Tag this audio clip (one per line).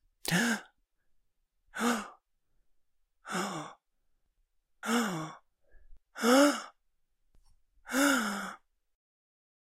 gasp
male
breathy
man
breath
gasps